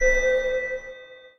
Alert short
Very short alert as percussion cartoon like sound with litle reverb